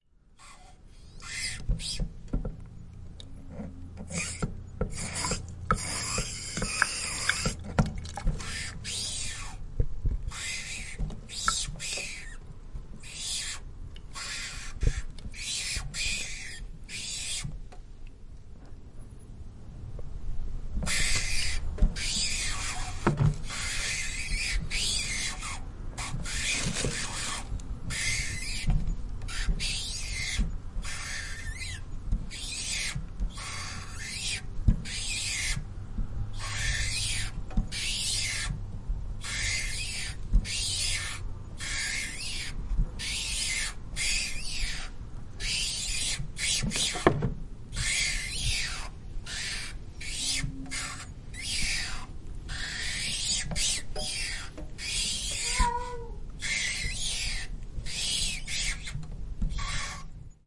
Household, Sink - Twisting Metal Sink Spigot (Shriek, Creature Death, Scream)
The spigot of a metal sink being twisted and rubbed with damp fingers. Possibly good for creature scream or whine sfx.
Creature, Cry, Distress, Friction, Horror, Household, Metal, Rubbing, Scream, Sink, Squeak, Squeal, Tension